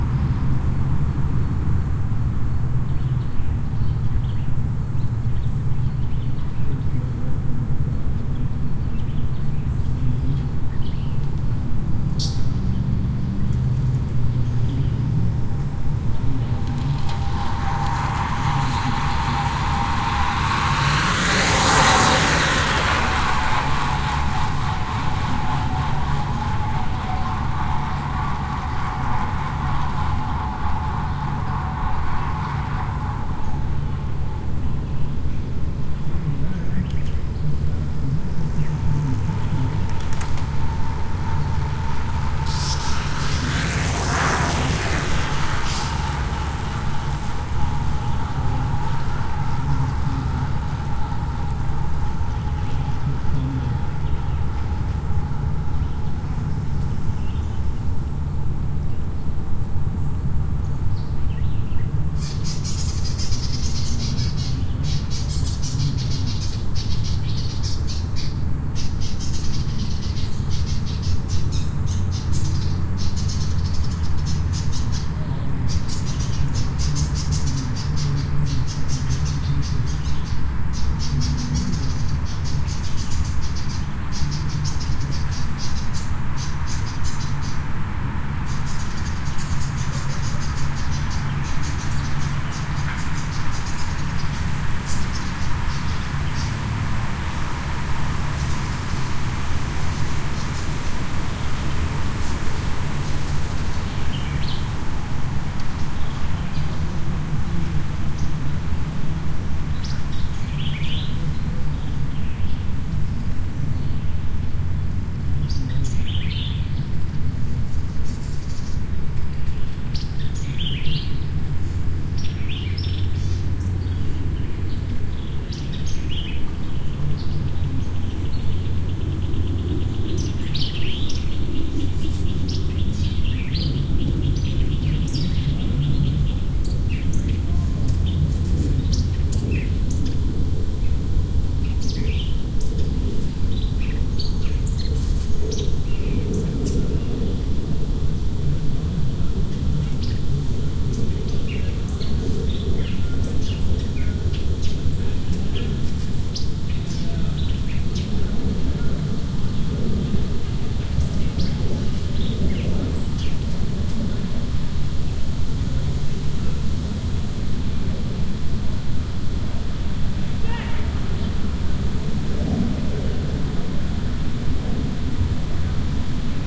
small passbys then bird ambience
Birds with announcer in background, a couple single pass-bys, more distant group passes by behind the microphones, machine noise in background.
Part of a series of recordings made at 'The Driveway' in Austin Texas, an auto racing track. Every Thursday evening the track is taken over by road bikers for the 'Thursday Night Crit'.
ambience, announcer, bicycle, birds, field-recording, human, nature